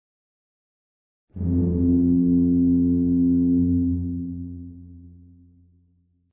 horn mild
Siren / hooter sound, created using Synth and Reverbs.
warning, disaster, alarm, alert, civil, tornado, ship, hooter, siren, signal, drone, raid, air-raid, defense, horn, emergency